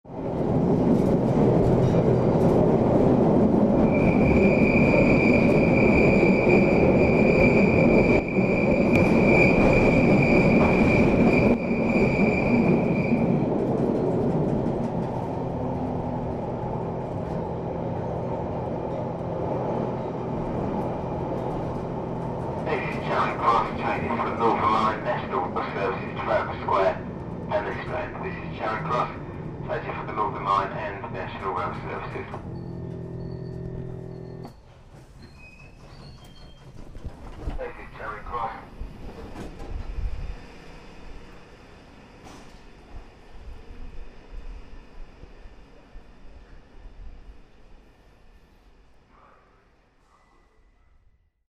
London Underground Arriving at Charing Cross Station
A train arriving at Charing Cross Station
announcement, field-recording, london, london-underground, metro, subway, train, tube, underground